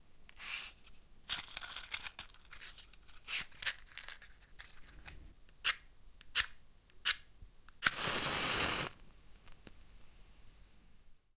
Light a match
Finding a matchstick in a matchbox and lighting it after a couple of tries. Recorded with a Zoom H5.
burning, crackle, fire, match, matchbox, matches, matchstick